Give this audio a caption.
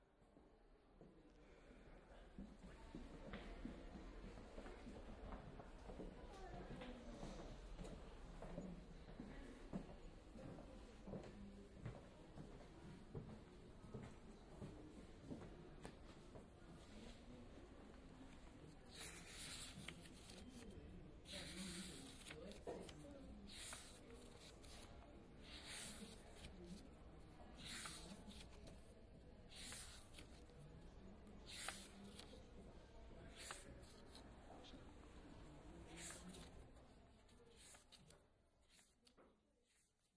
caminhar para folhear livro serralves
Walking and browse a book in the library.
This recording was made with a zoon h2 and a binaural microphone in Fundação de Serralves on Oporto.
walking, library, Field-recording, public-space, binaural